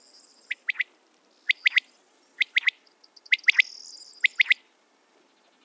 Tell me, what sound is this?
call of a bird I couldn't see (a quail, actually). Sennheiser ME62(K6) > iRiver H120 / canto de un pajaro que no llegué a ver. Es una codorniz.